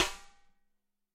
Ludwig Snare Drum Rim Shot
Drum, Ludwig, Shot, Snare, Rim